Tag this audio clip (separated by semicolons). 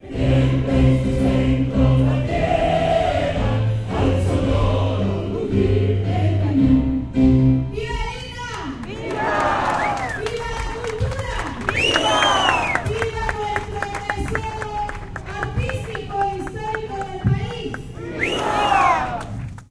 demonstration; mexico-city